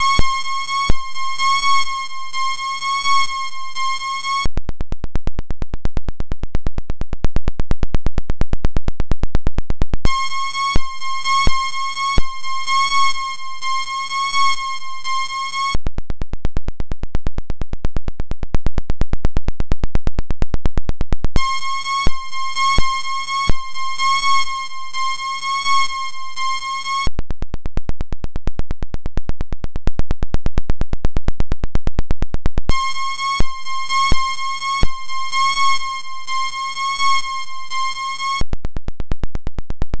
This is the audio sample from The Disco LED Hack
Description:
This is a How-To video that illustrates how to hack into a self-flickering LED.
This experiment was conducted during the MusicMakers HackLab at the Artifact Festival at the STUK Kunstzentrum in Leuven, Belgium
With Arvid Jense, Elvire Flocken-Vitez and Create Digital Music.
Sample available here!
Thanks Amine Mentani!